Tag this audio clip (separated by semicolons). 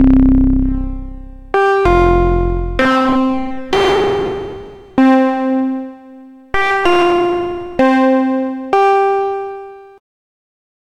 mgreel; morphagene